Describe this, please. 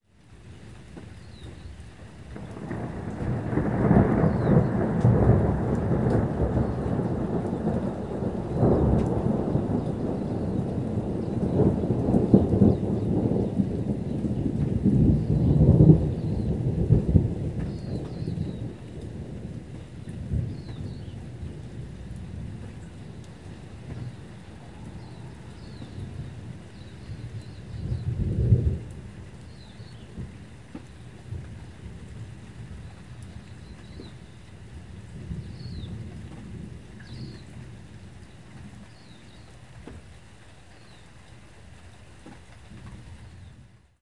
Thunder And Birds
I recorded this during a thunderstorm. You can hear thunder, birds tweeting and light rain.
It was recorded in my house in the suburbs of Hastings, Hawke's Bay, New Zealand. Recorded at about 2pm on 15 October 2016 with a Zoom H4n. I put the microphone just inside the door, and recorded with the door slightly open.
birds, rain, storm, thunder, thunder-storm, thunderstorm, tweeting, weather